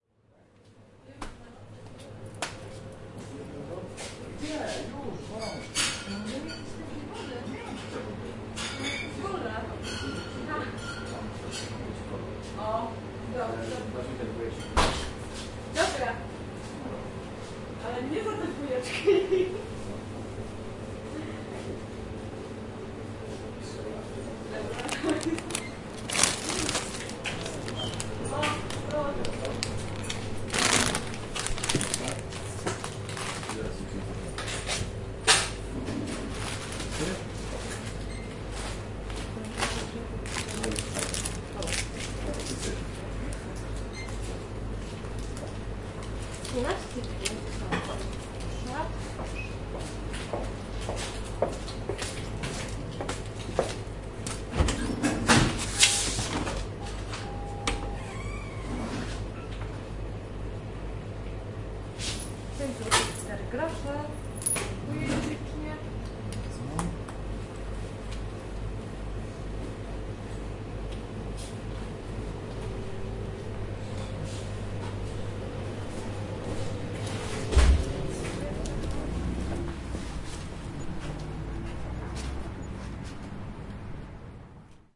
gas station shop 030411
03.04.2011: about 01.00 at night. in the gas station shop buying beer and packet of crisps. the Wilda district in Poznan